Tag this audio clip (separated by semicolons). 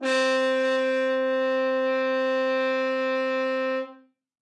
brass; c4; f-horn; midi-note-60; midi-velocity-105; multisample; muted-sustain; single-note; vsco-2